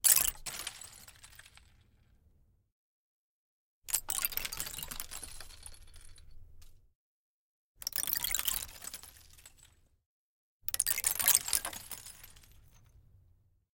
ICICLES breaking series
Series of breaking small icicles from a ledge, falling onto a hard surface. NTG-3, Rode Blimp, Sound Devices 702T.
break, ice, shatter, tinkle, winter, icicle, cold